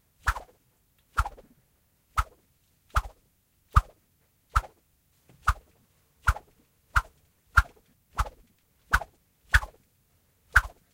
a stick (glass fiber) cuts the air